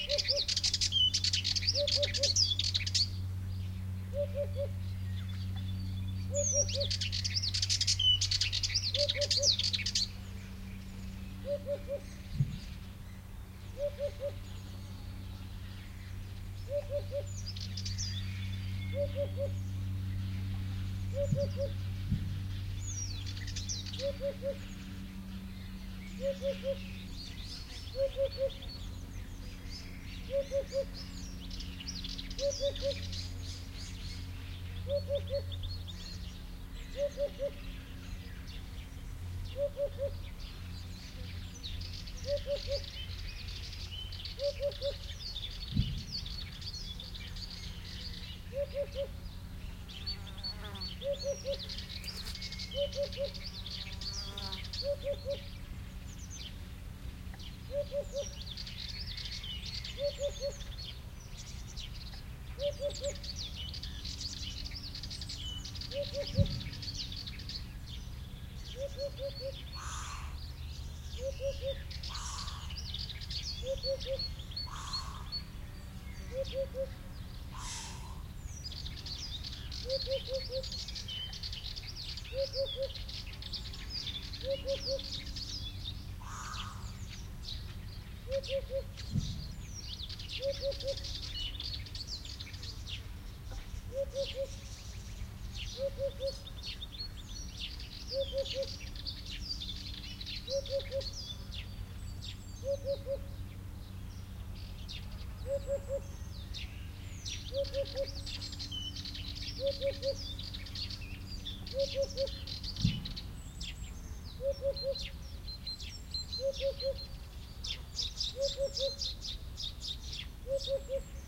a Serin sings close, then enters a distant Hoopoe call, an airplane, other birds (Great Tit, Blackbird, Serin, Sparrow), flies, distant detonations... Recorded in Pine-Wild Olive forest-Scrub near Puebla del Río, S Spain (Doñana area). I like a lot the weird screeching sound you can hear several times in this recording (1:10-1:30) but can make no suggestion as to source. Can you help? ME66 + MKH30 mics to Shure FP24 and Iriver H120, M/S decoded
ambiance, hoopoe, spring, serin, field-recording, south-spain, birds, nature